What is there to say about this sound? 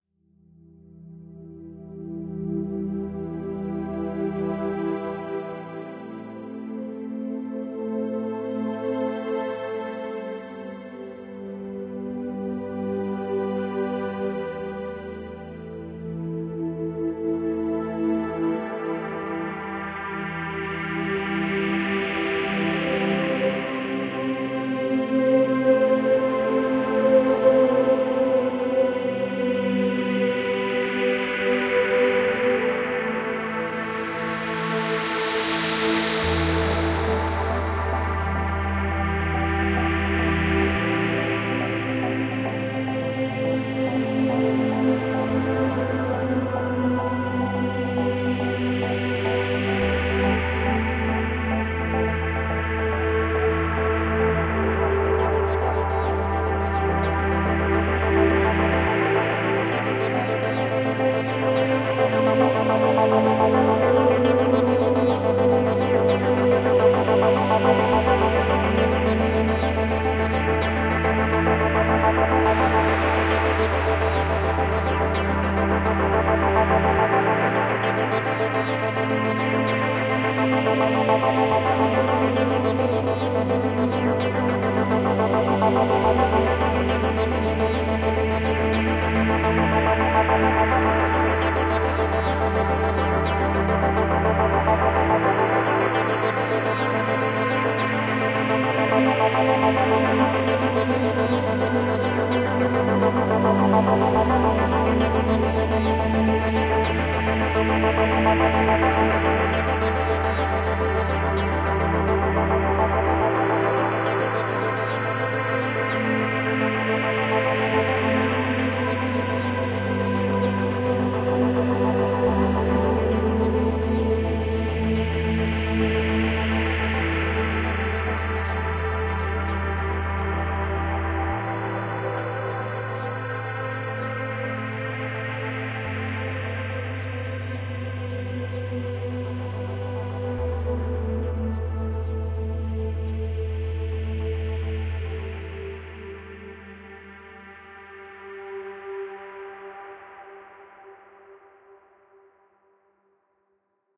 This is is a track with nice progressive sound. It can be perfectly used in cinematic projects. Light and beautiful pad.
Regards, Andrew.
ambience, ambient, atmosphere, calm, chill, deep, meditation, pad, relax